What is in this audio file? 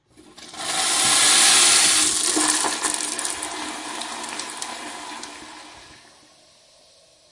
Toilet flushing
A toilet being flushed.
Rec: ZoomH2n, XY mode
bathroom, flush, piping, plumbing, rush, toilet, toilet-flush, water